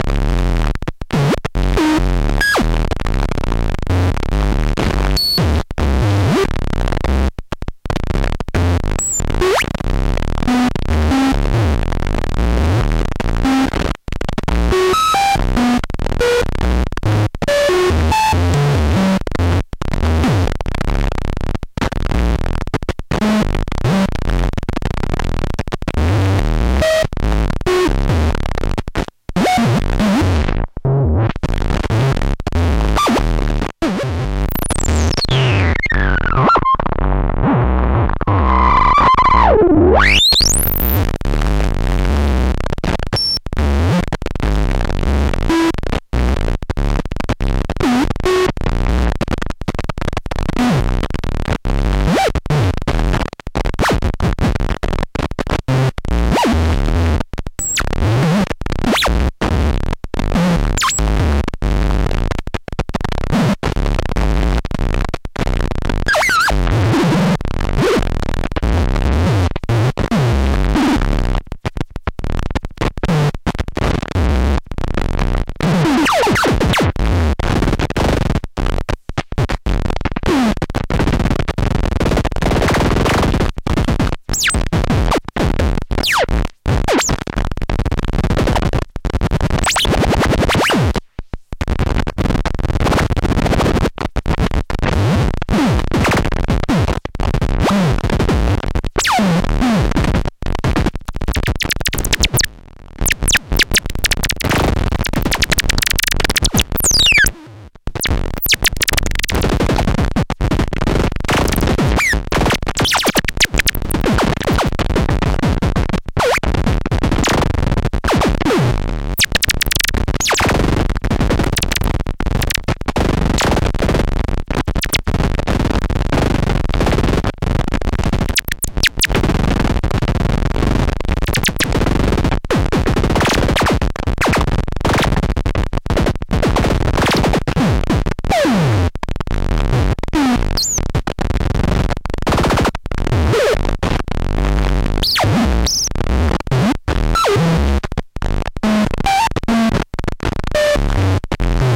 MS10-wild
MS10 and MS04 random tweaking.
analog, Korg, MS04, MS10, synthesizer